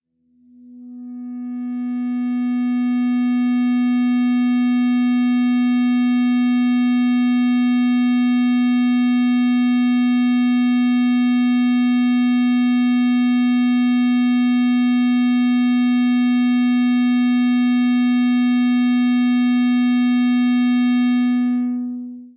Dist Feedback E-6th str
Feedback from the open E (6th) string.